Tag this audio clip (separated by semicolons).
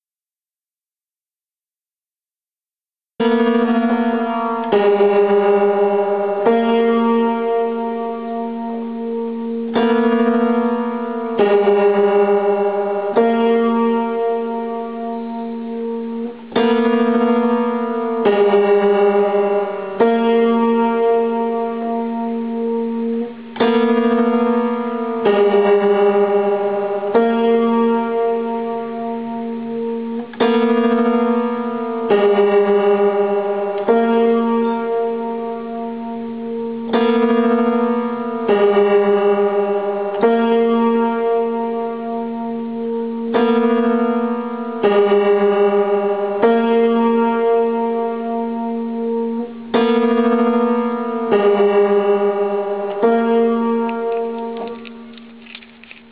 montseny2; old; piano